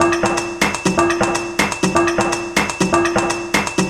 tascam dr05 + softwares 123 bpm
beats, drumloop, drum, breakbeat, beat, loop, experimental, idm, drums